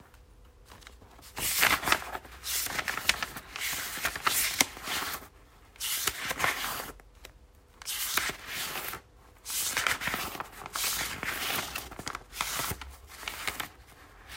using sound
1. slice the paper
cell-phone, cell, made, recorded, phone